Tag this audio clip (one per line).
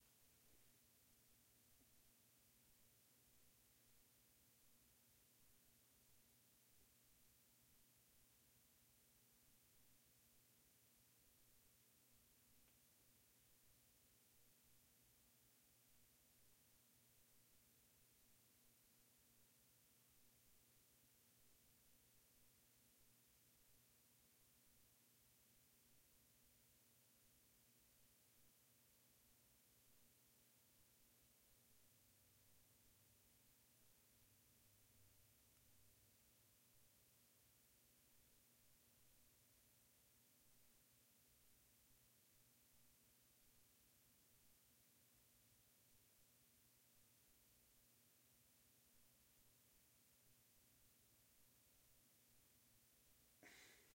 horror
tone
room